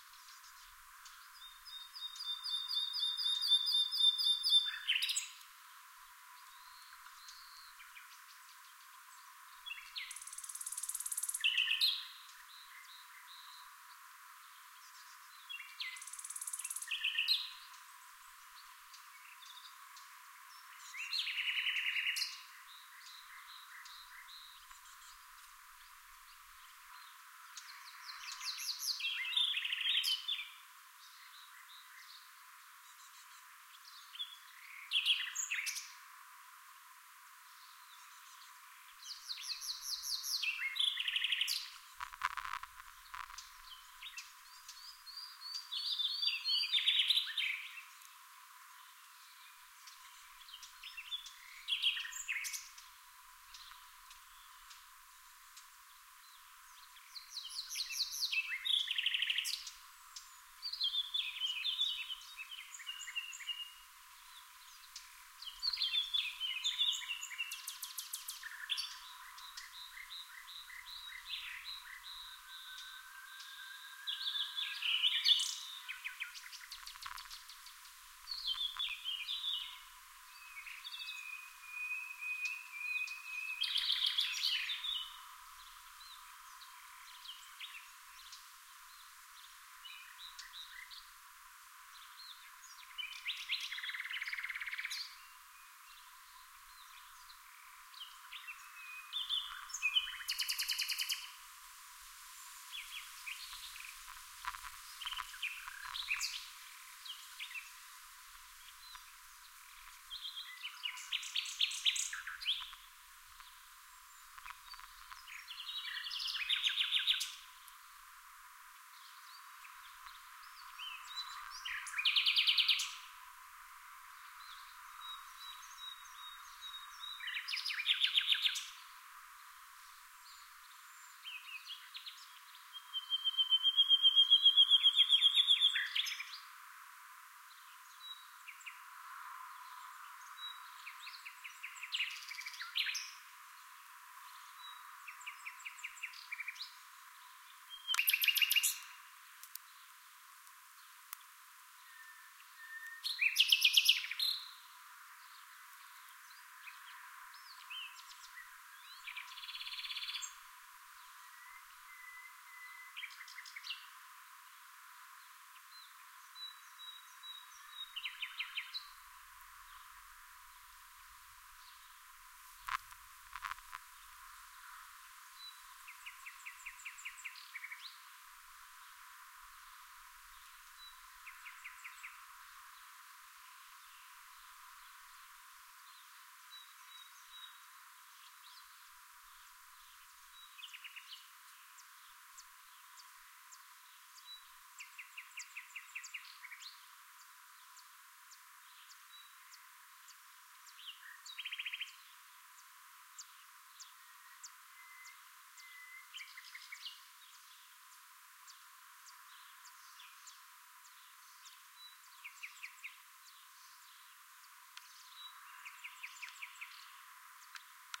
birds singing in a spring afternoon in countryside close to Vada. There may be audible traces of wind noise, cars and children voices in the background